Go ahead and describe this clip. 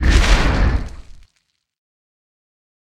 Impact 4 full
An impact explosion on a metal surface
bang, bomb, boom, detonate, explode, explosion, explosive, tnt